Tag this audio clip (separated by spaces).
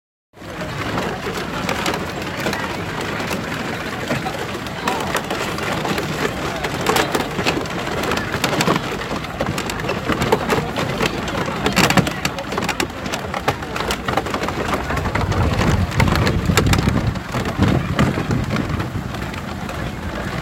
floors wood